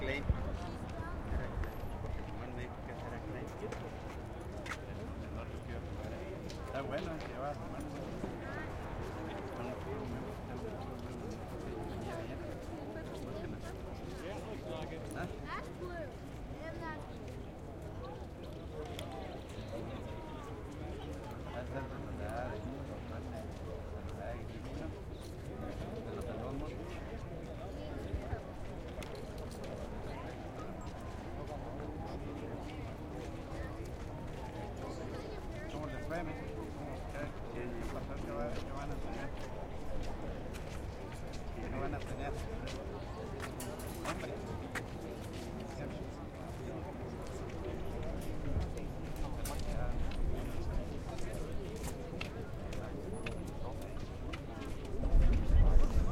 crowd mulling about between races
This is a recording of the crowd in-between races at Arapahoe Park in Colorado.
horse, track